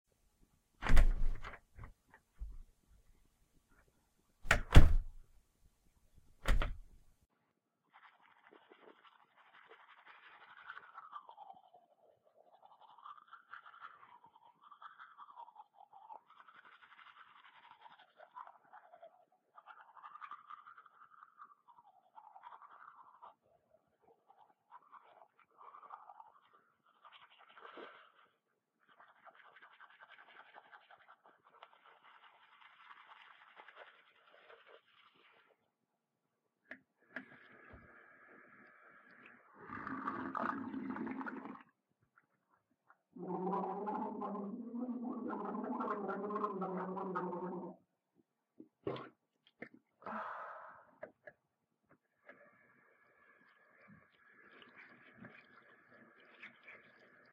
Classic Bathroom Sequence
Your classic 'roommate in bathroom' sequence heard in cartoons and comedies. Cheers!
bathroom, classic, clich, cliche, sequence